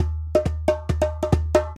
Djembe Loop 02 - 125 BPM
A djembe loop recorded with the sm57 microphone.
groove, trance, tribal, drum, tribe, remo, ancident, djembe, percussion, africa